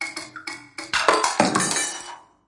loop mehackit 1
An effected loop of Mehackit’s Music Machine drumming various objects with solenoids. Made for Sonic Pi Library. Part of the first Mehackit sample library contribution.
digital, drum, drum-loop, drums, effect, electric, future, machine, mehackit, metallic, music, rhythm, robot, sample, sci-fi, solenoid, sound-design, sounddesign